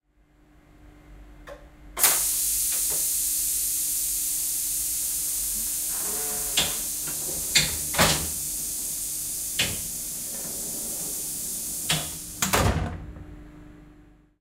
Tilt Train Door Close 3A
Recording of a pneumatic door closing on a tilt train.
Recorded using the Zoom H6 XY module.
close, door, train